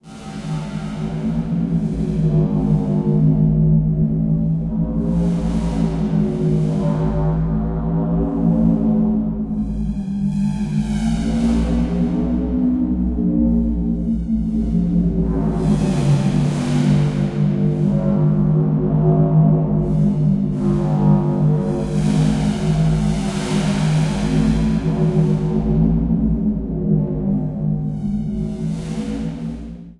electric piano
original sound is taken from a well known analog synth and is heavily processed with granular-fx, bit-reduction, reverb, filtering, pitch-shifting and other effects...
dark, fx, granular, horror, scary, sound-fx, soundscape